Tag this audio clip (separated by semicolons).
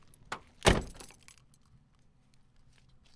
slam; car; car-door